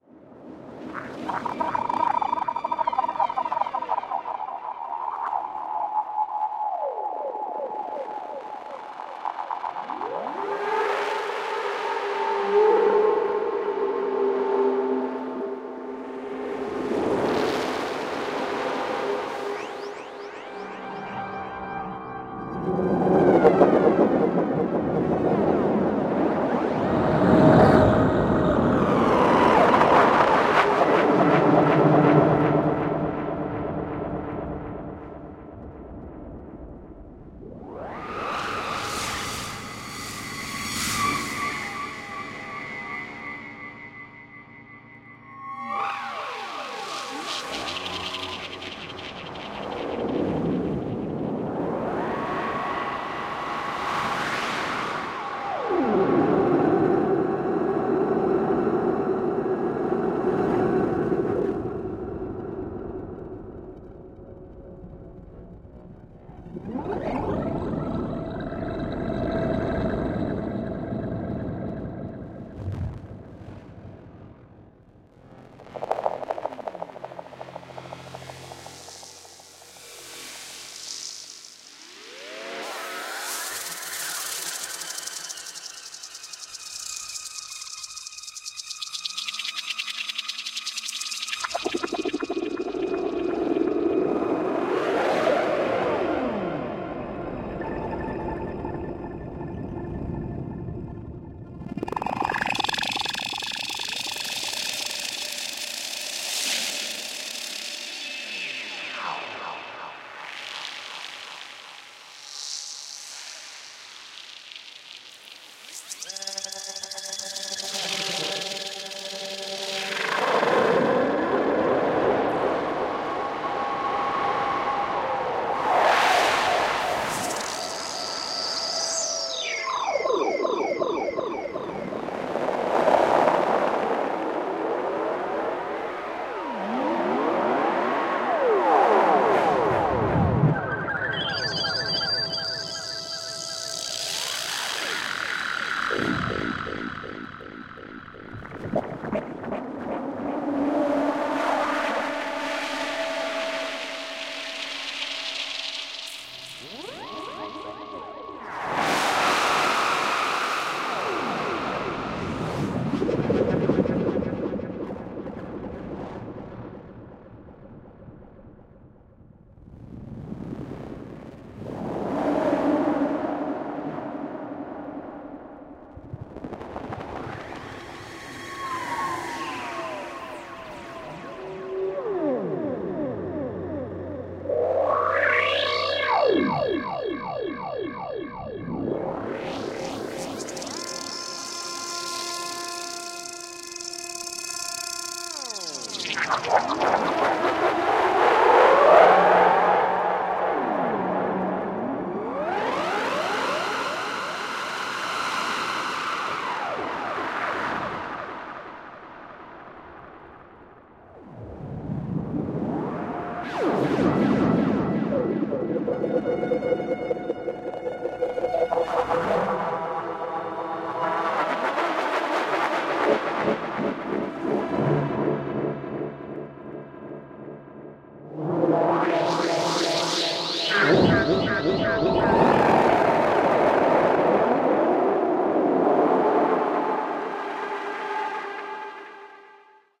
ESERBEZE Granular scape 25
16.This sample is part of the "ESERBEZE Granular scape pack 2" sample pack. 4 minutes of weird granular space ambiance. Weirdness on space radio station.
reaktor, soundscape, electronic, space, drone, effect, granular